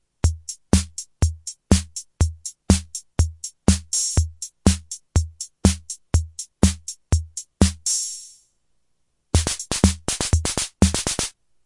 4 measures of rhythm "Mersey Beat" from drum machine of vintage German keyboard Vermona SK-86 plus one measure of drum fill-in - sufficient for re-creation of the original rhythm. Recorded in stereo at approx. 120 BPM.
Organ, Piano, SK-86, Vermona, Vintage, Czechkeys, German-Democratic-Republic, Keyboard